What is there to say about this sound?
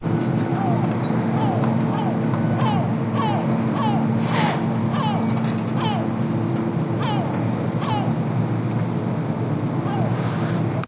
sea birds
some sea-birds noises near the water in the port of Genova.